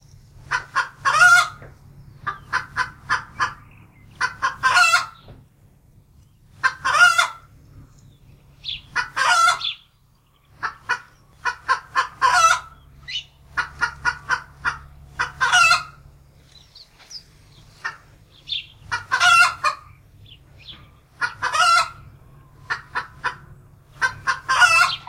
Chicken Alarm Call full with Occasional bird sound
The alarm call of one of our chickens after she spotted a cat in our garden. A short version of one of a single clean cackle (taken from this recording) is also available.
Recorded with a Samson Q7 microphone through a Phonic AM85 analogue mixer.
clucking, chickens, cluck, cackle, animal, bird, warning, call, chick, hens, Chicken, predator-alert, farm, hen